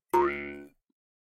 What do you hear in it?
Mouth harp 8 - lower formant staccato up
A mouth harp (often referred to as a "jew's harp") tuned to C#.
Recorded with a RØDE NT-2A.
instrument, jewsharp, foley, tune, harp, mouth, traditional